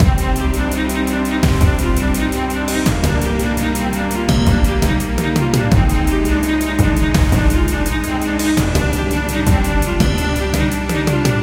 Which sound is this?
Epoch of War 9 by RAME - War Victory Fight Music Loop
Epoch of War is a war theme looping sound with triumphant and cinematic feel to it. There are a few variations, available as Epoch of War 1, Epoch of War 2, and so on, each with increasing intensity and feel to it.
I hope you enjoy this and find it useful.